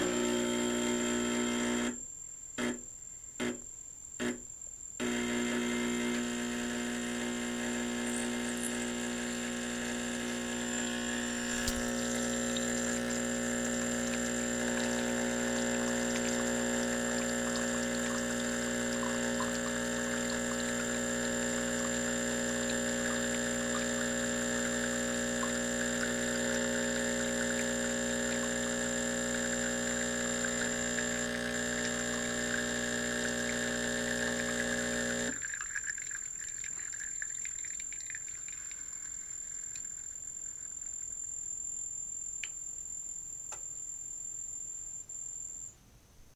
Sound of my Breville Icon Triple Pump Espresso Machine making a cup of espresso.